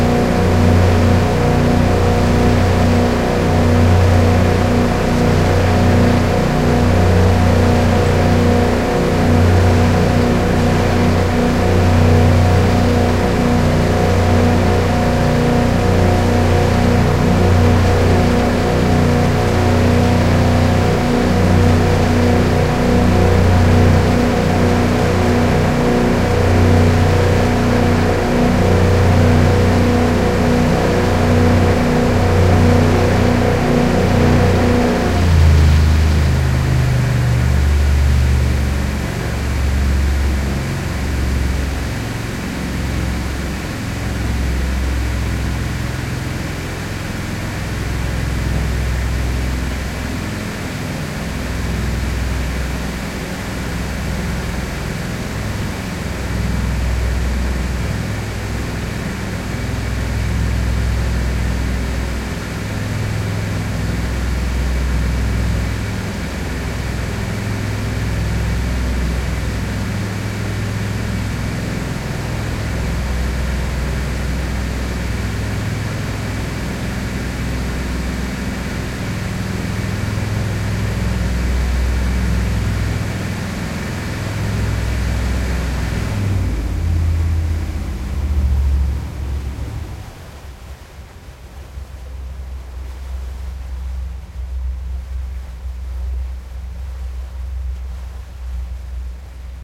speed boat outboard motor medium gear down and shut off
boat, shut, off, gear, medium, speed, slow, outboard, down, motor